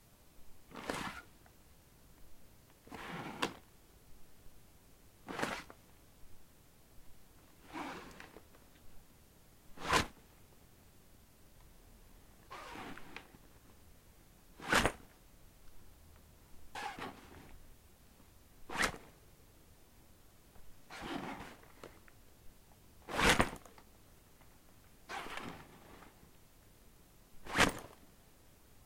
Bag rustle
Picking stuff up from a bag. Recorded with an Zoom H4n.